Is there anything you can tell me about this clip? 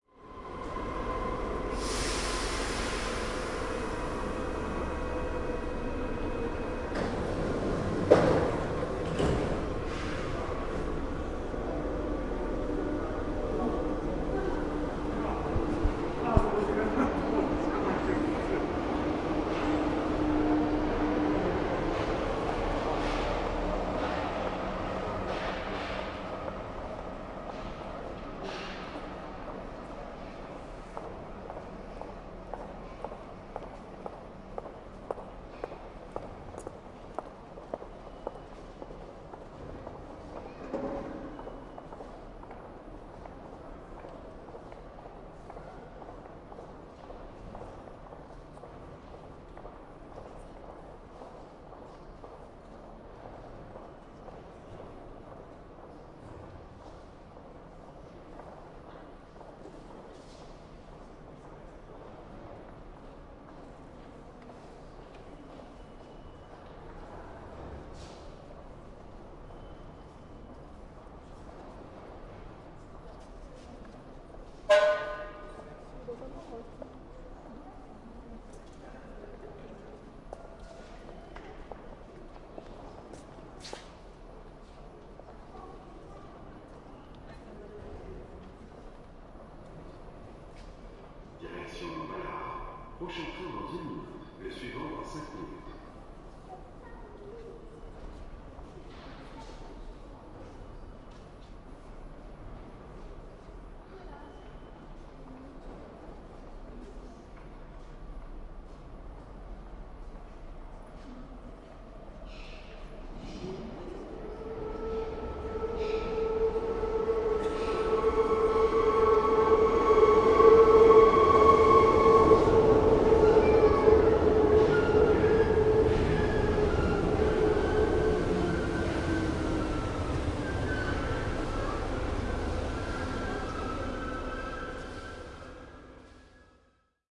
Paris Metro 002 Front
This pack contains recordings that were taken as part of a large project. Part of this involved creating surround sound tracks for diffusion in large autidoria. There was originally no budget to purchase full 5.1 recording gear and, as a result, I improvised with a pair of Sony PCM D50 portable recorders. The recordings come as two stereo files, labelled "Front" and "Rear". They are (in theory) synchronised to one another. This recording was taken in the Paris Metro (Underground).
Field-recording, Paris, alarm, buzzer, doors-closing, doors-opening, metal-wheels, metro, people, trains, underground, walking